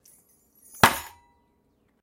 22 Keys Falling to single hit Snare
I hope they are helpful for you! There are many snares, a few kicks, and a transitional sound!
drum, rim, drums, 1-shot, snare